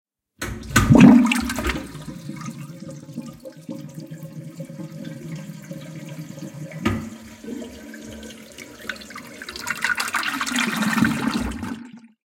20170101 Toilet Flushing
Toilet Flushing, recorded with Rode iXY
liquid, shit, water, flushing, wc, restroom, toilet, draining, flush